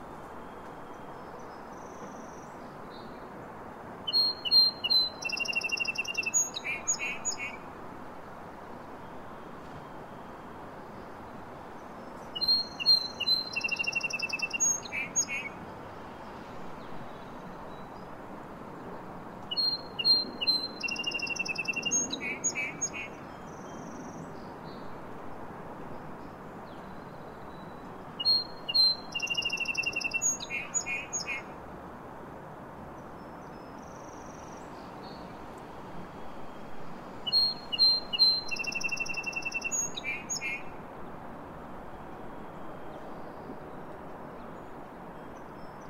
20200303 birds of spring
There is some cultural noise in the background, but the bird song is clear enough. This little fellow is a singing bird on a wire, looking for a mate on the morning of March 3, 2020 in Dartmouth, Nova Scotia.
Scotia, bird, spring, chirping, Nova